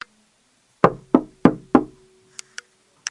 Knock on door #1
Someone knocking four times on a wooden door. Not fast, not slow, not loud, not soft. This is a great audio clip.
wood, bang, knocks, door, knock, wooden